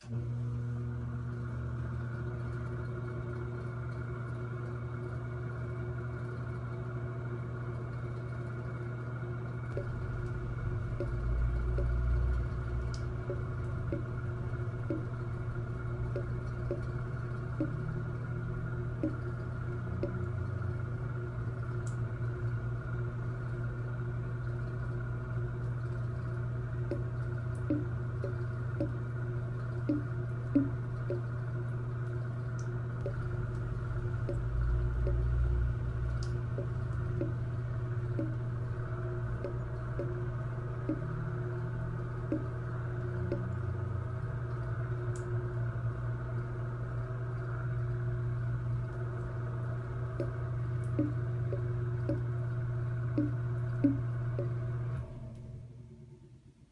bathroom ambient
The sound of a bathroom: a fan and dripping water.
fan, bathroom, sink, drip, leaking, water, faucet, tap, leaky